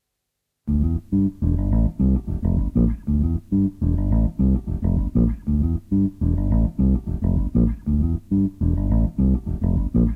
funk bass edit
Bass playing through a zoom bass effects system which was then taken
straight into my 4-track tape machine. A funky bassline, intended to be
like an old seventies disco line.
bass, effects, funk, line, processed